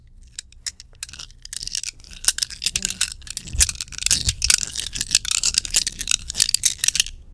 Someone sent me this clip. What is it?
Som de choque/ fricção/ atrito entre bolinhas de gude ou vidro. Gravado em um microfone condensador de diafragma grande para a disciplina de Captação e Edição de Áudio do curso Rádio, TV e Internet, Universidade Anhembi Morumbi. São Paulo-SP. Brasil.
ball, bolinha, friction, glass, gude, marble, shuffle